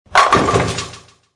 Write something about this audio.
Bowling strike from kyles's sound "bowling-alley-pins-serving-mechanism2".
pin, ball, strike, bowling